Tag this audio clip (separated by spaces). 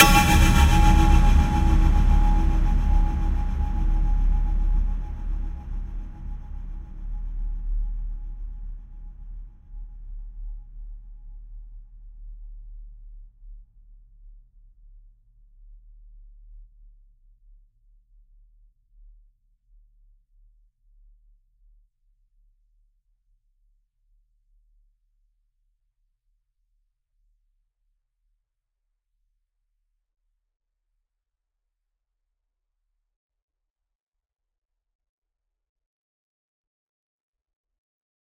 movie raiser mind-blowing trailer orchestral impact budget low-budget sub thrilling